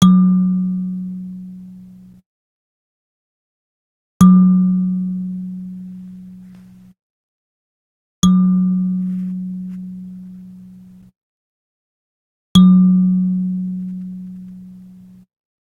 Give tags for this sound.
African; Kalimba; thumb-piano

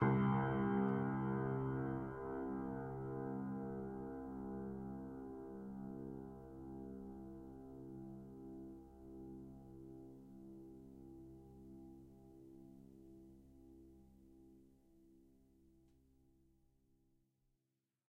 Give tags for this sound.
piano; old; string; horror; sustain; pedal; detuned

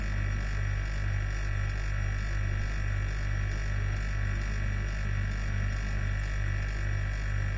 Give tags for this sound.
atmosphere; fridge; kitchen; noise

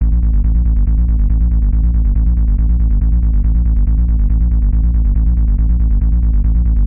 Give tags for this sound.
dance; synth

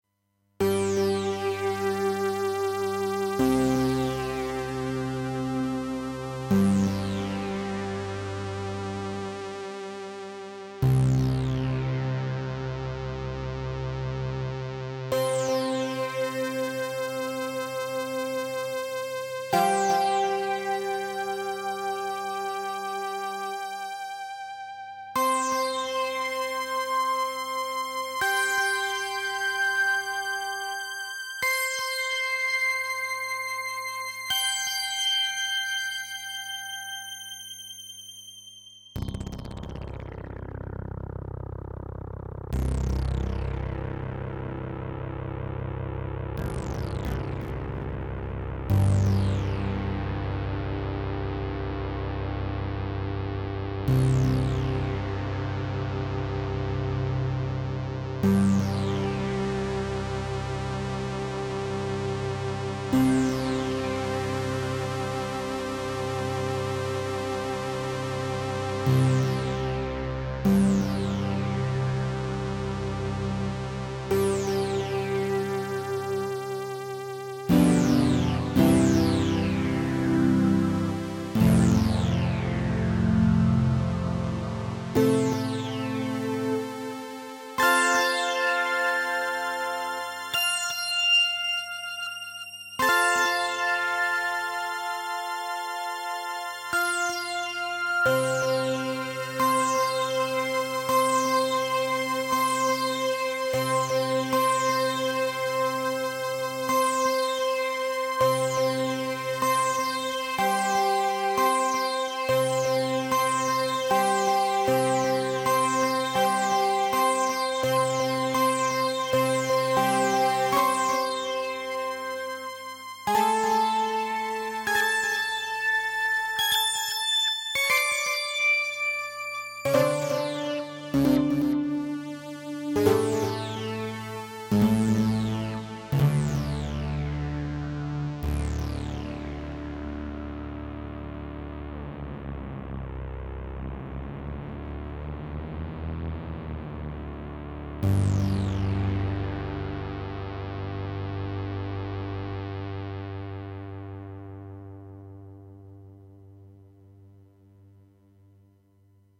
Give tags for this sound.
drone; soundscape; space; eerie; waves; ambient; wave; evolving; pad; Blofeld; experimental

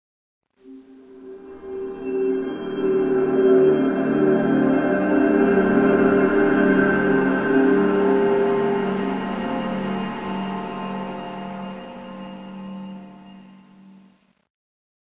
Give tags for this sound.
positive; moving; movement; bright; sound; evolution; change; towards; light; dream

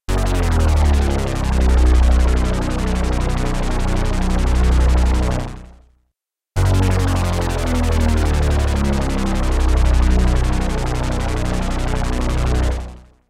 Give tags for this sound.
pitch; modulation; synthgong; gong; synthetic

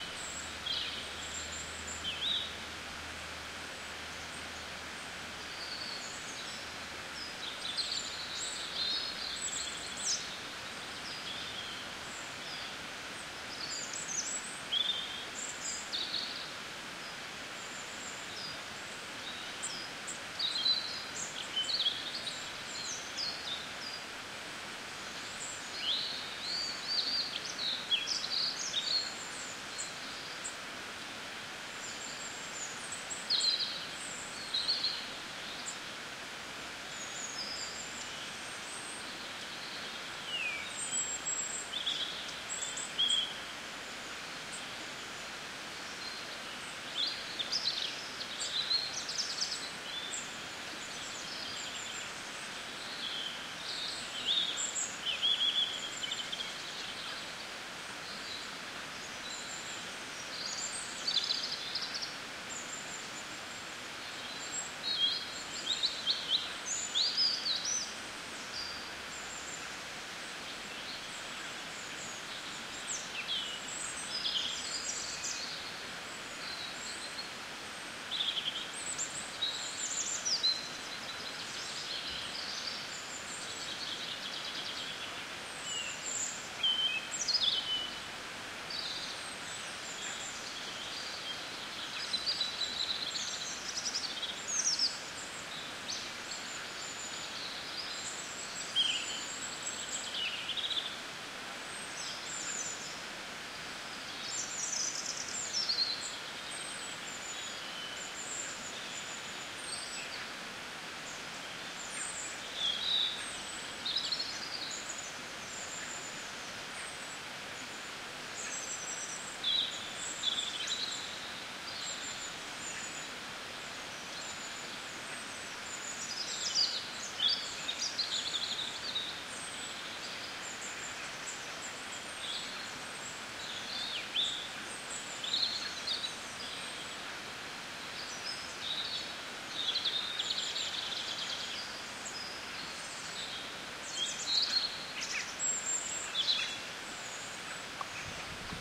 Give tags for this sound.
ambience; ambient; bird; birds; birdsong; field-recording; forest; morning; nature; spring

